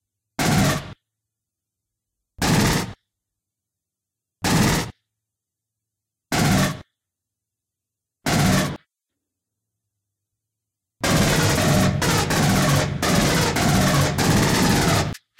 An m41a pulse rifle firing
aliens, rifle, pulse, m41a